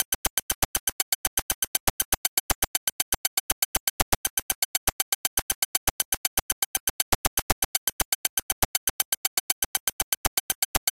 hg beat glitch 8Hz 001
A 120 bpm loop made in Hourglass from various files read as raw audio data.
120-bpm, 120bpm, glitch, Hourglass, loop, noise, percussion